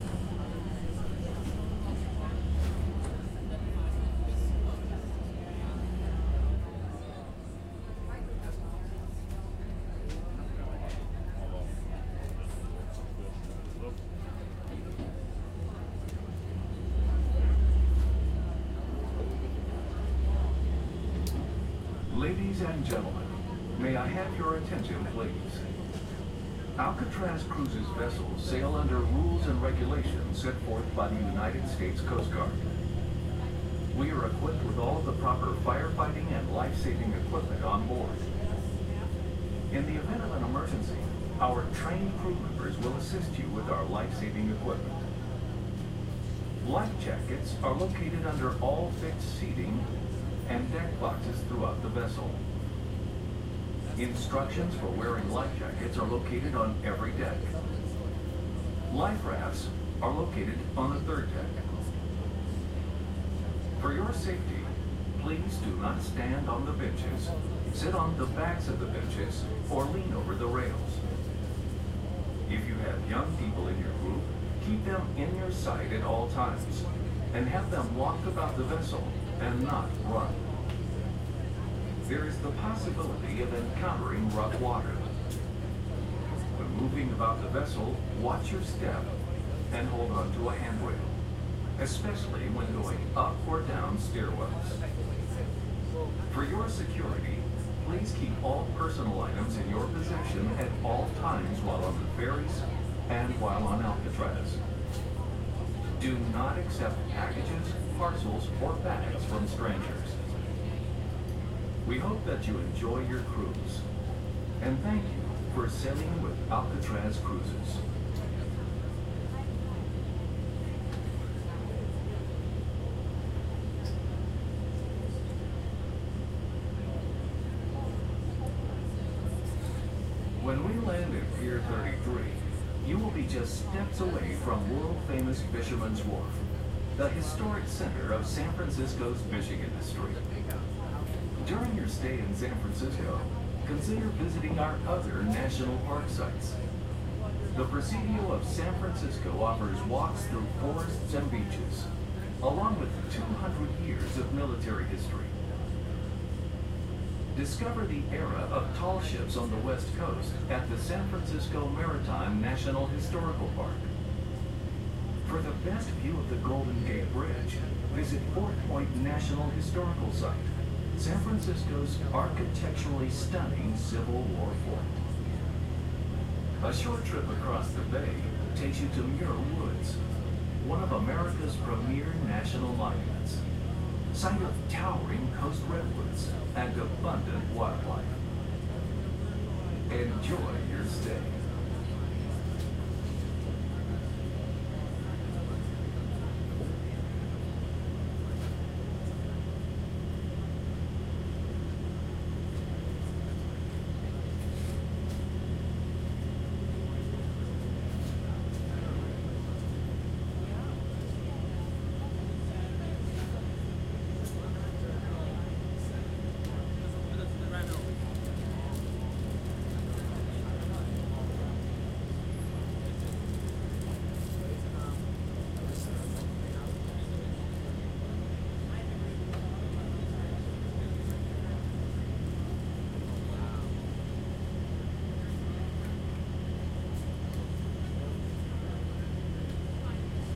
Ferry to Alcatraz
This is the sound of a ferry ride to Alcatraz Island, including the entire boat announcement played during the trip.
alcatraz, field-recording, san-francisco-bay, boat, ferry, tourists